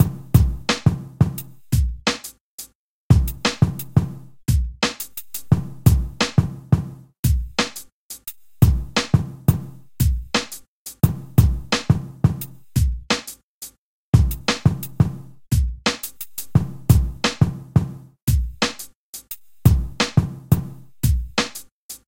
Great for Hip Hop music producers.